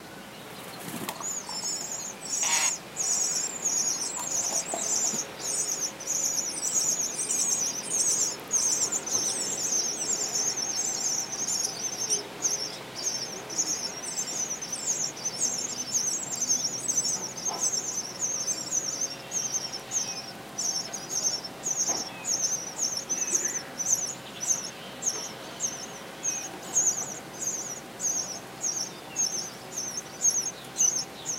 the starling brings food to his children
birds, noise, nature, recorder